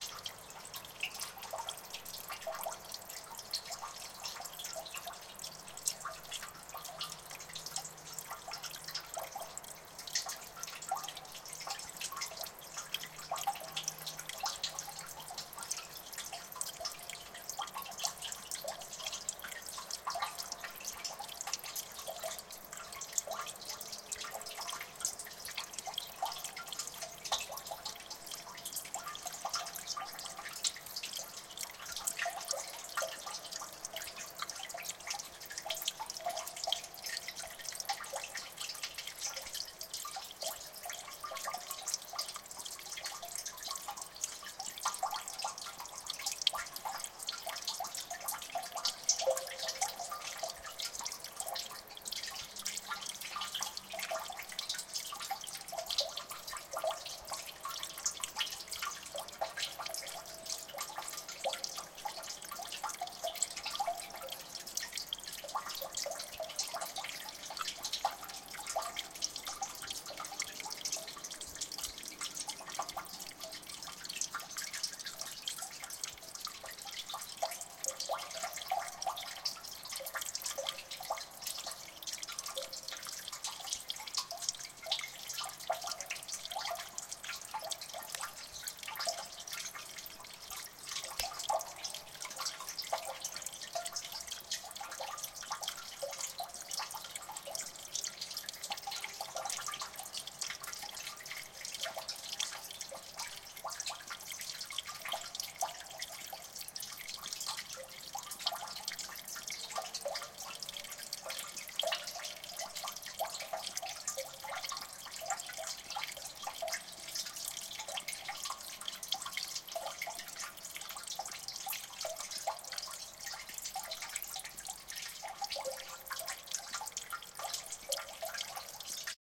gully with water drips
water dripping into a drain after a rainy day. recorded from upside at the gully.
gully, dripping, rain, street, drain, sewage, water